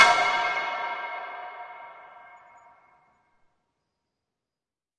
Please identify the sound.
Hit loin short02
Stereo ORTF recorded with a pair of AKG C451B and a Zoom H4.
It was recorded hitting different metal stuffs in the abandoned Staub Factory in France.
This is part of a pack entirely cleaned and mastered.
industrial, staub, hit, percussive, metal, field-recording, metallic, percussion, drum